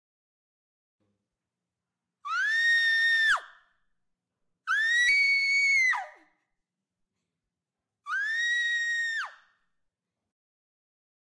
SCREAM GIRL
This is the sound of a female screaming.
yell, screech, screaming, female, agony, scream, pain, girl